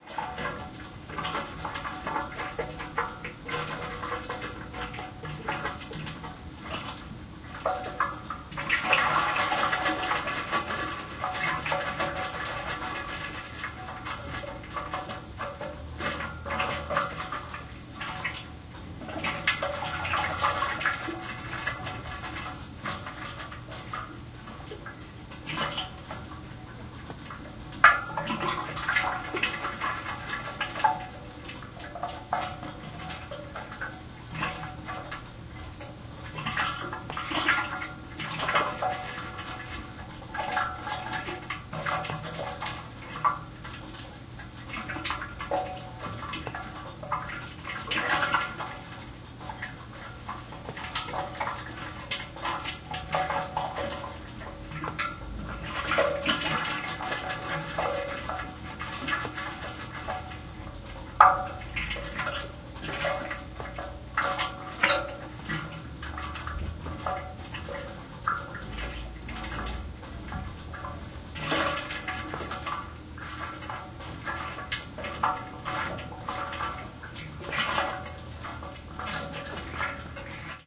Radiator - Fills up with water
Hollow metallic liquidy sound of radiator filling up. Miscellaneous clicks and pops.
water, liquid, fills